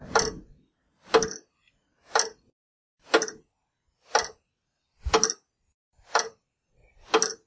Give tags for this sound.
clock
second
time

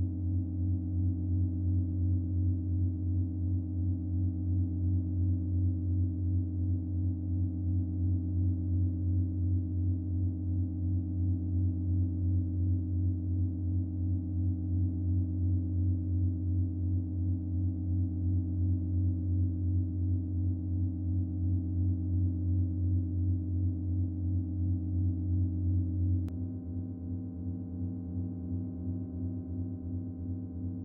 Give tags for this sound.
atmosphere
background
effect

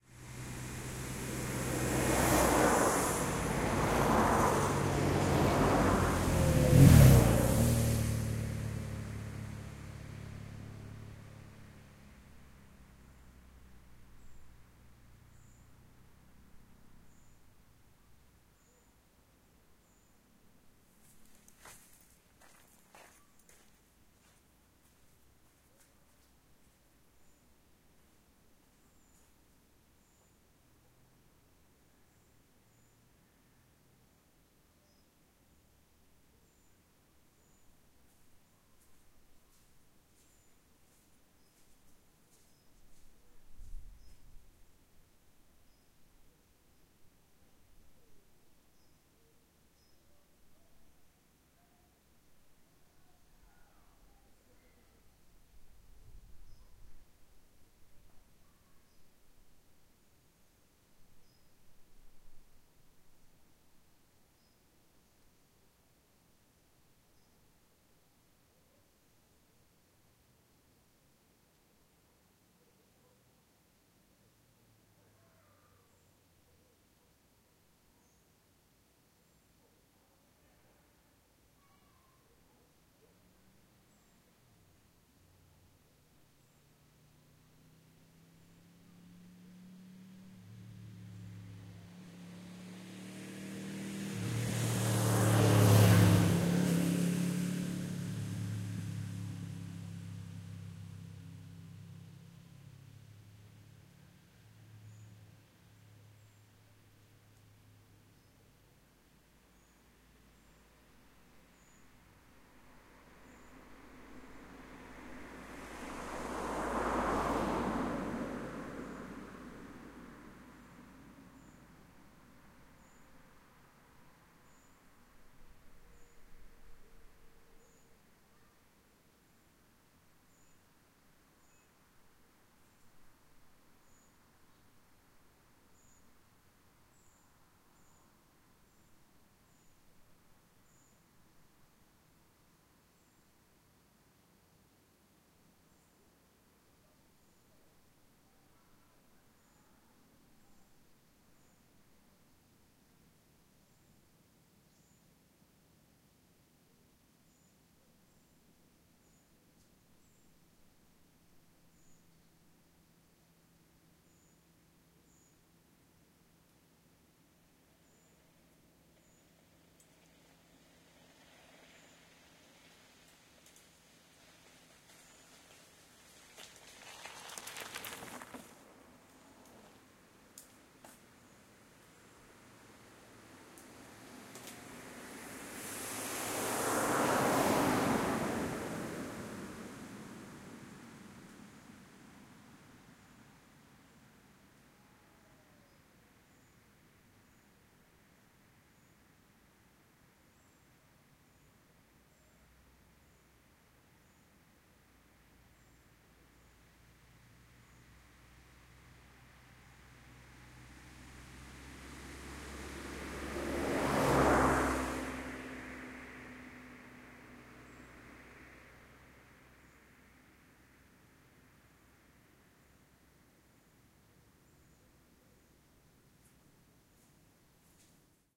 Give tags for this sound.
ambience
birds
car-passing-by
cars
distant
dogs
field-recording
marata
nature
zoom-h4n

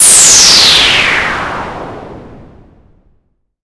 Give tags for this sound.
game
sounds
games
sf
attack
video

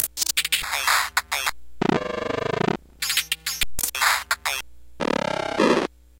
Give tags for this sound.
digital
noise

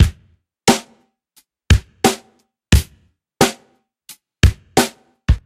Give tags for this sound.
dry
kick
snare

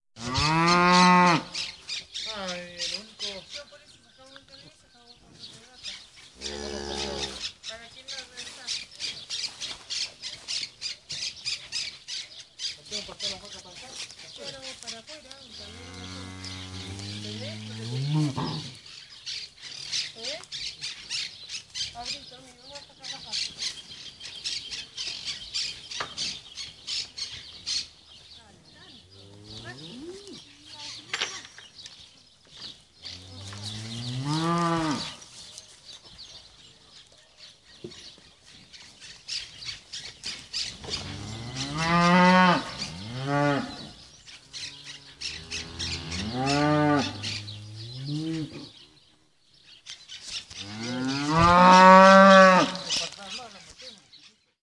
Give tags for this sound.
Mapa-Sonoro
Patrimonio-Inmaterial
Cabildo
Bahia-Blanca
Soundscape
Fieldrecording
Paisaje-Sonoro

